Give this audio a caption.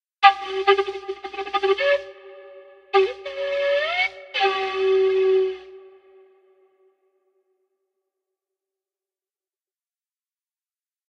F# phrase on Native American Flute
This is a simple fifth phrase in the key of Г
using dodeca notation: A Д B C Φ D Đ E F Г G Љ
(although it's played rather out of tune)
The flute is made of red cedar and tuned to Г.